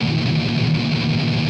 dis muted D# guitar
Recording of muted strumming on power chord D#. On a les paul set to bridge pickup in drop D tuneing. With intended distortion. Recorded with Edirol DA2496 with Hi-z input.
les-paul, strumming, d